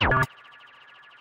17talien perc
Alien-ish perc sound. Added 2 LFO: pitch and filter modulation. On the background, an alien laser can be heard.
drum, laser